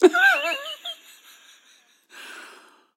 Young man laughing enthusiastic.